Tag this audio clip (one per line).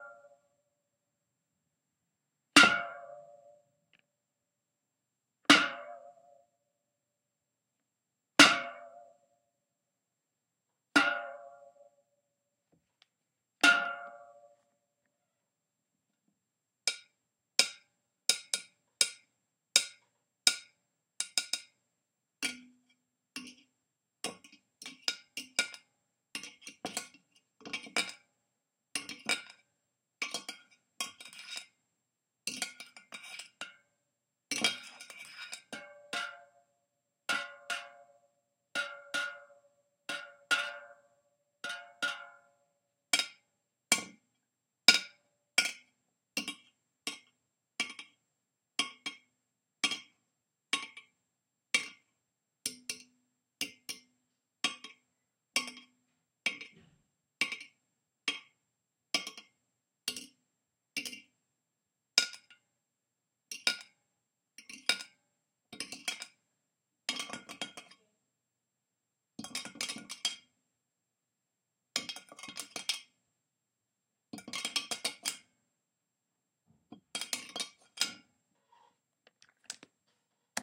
home-recording
ableton-live
field-recording